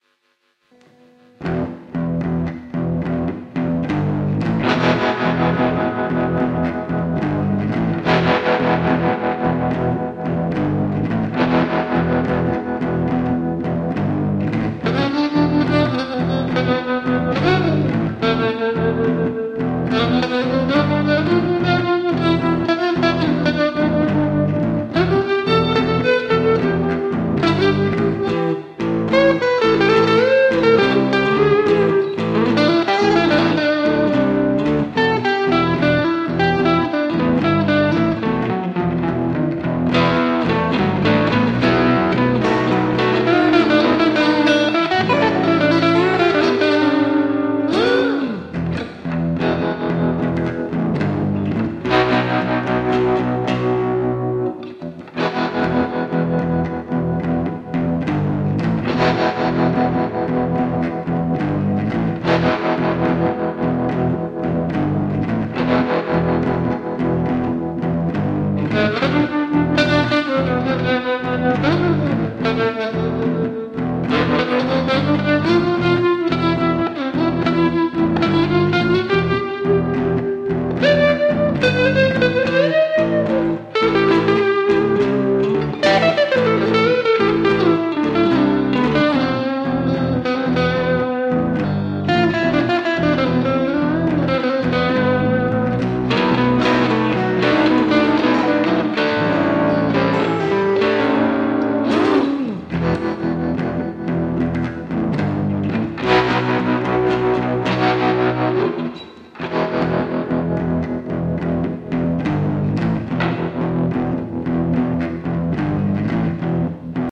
E-Major blues
12-bar blues in E Major, rhythm guitar and lead guitar with a tremolo effect and drive.
blues, electric, experimantal, experimental, guitar, instrumental, music